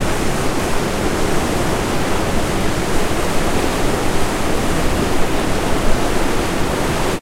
Deep rapids/river flowing
Fast flowing deep rapids
Rapids, River, White-Water